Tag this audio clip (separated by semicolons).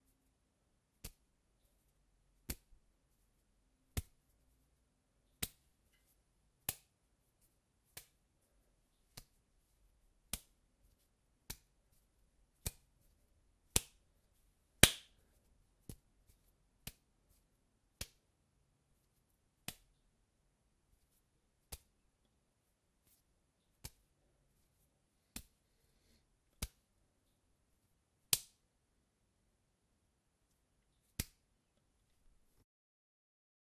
ball; catch